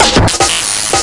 snare from anal blast 666
core, drum, glitch, noise, snare